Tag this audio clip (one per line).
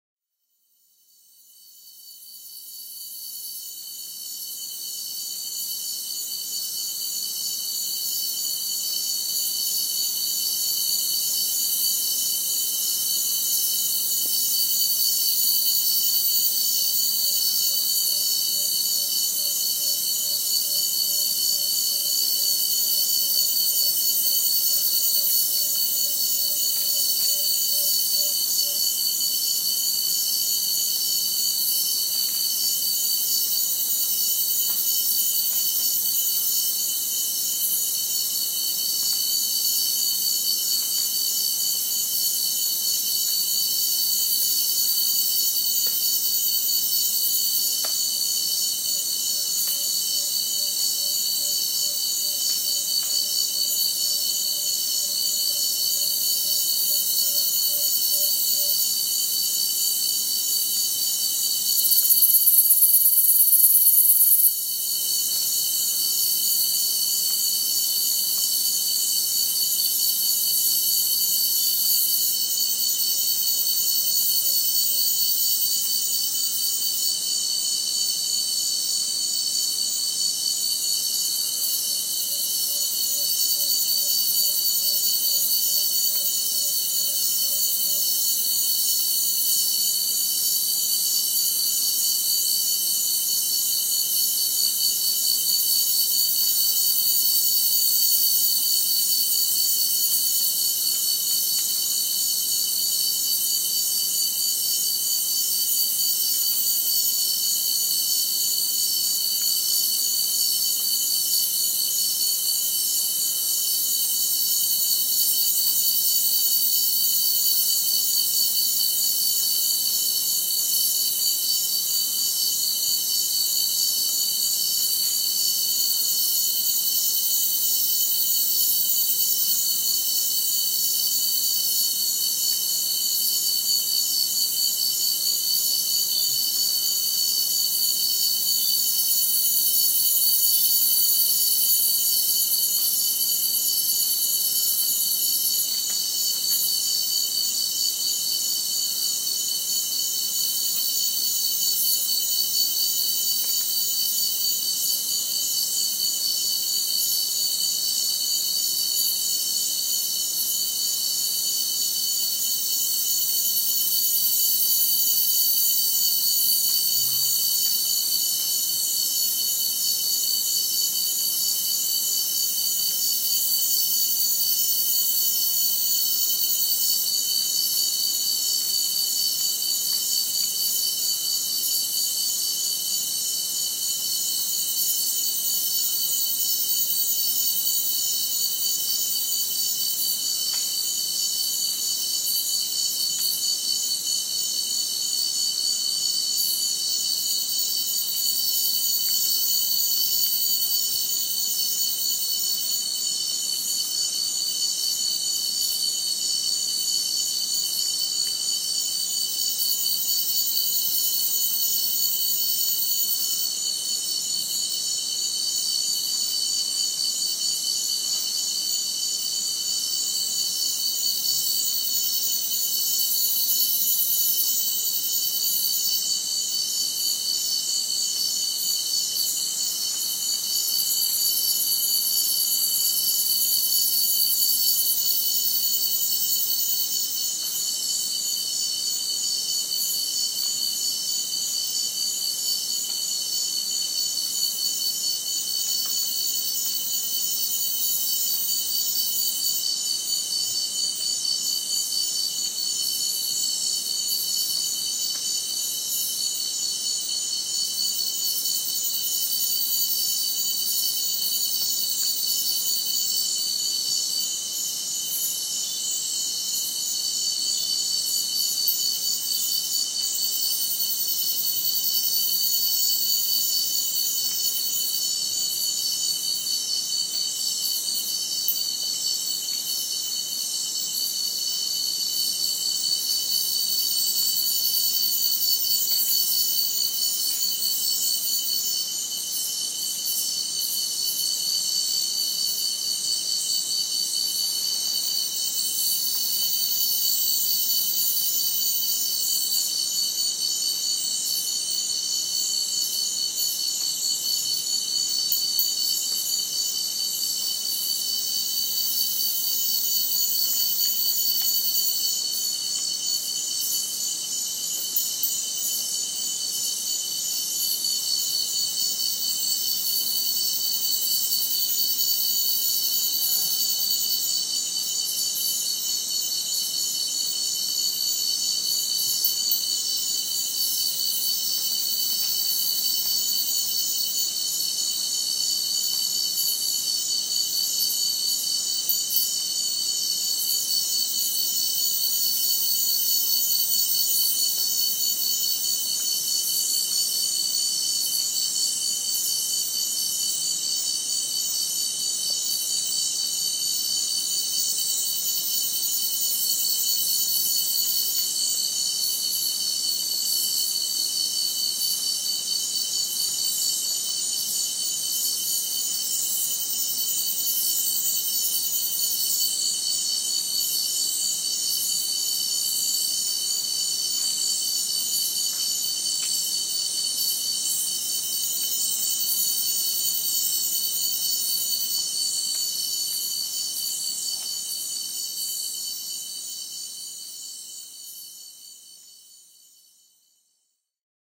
Amazon
Amazonas
Andes
bugs
cicada
crickets
field-recording
forest
insect
insects
jaguar
jungle
Nacional
national-park
nature
nature-sounds
night
Peru
Posada
rainforest
Reserva
River
sloths
South-America
Tambopata
trees
tropical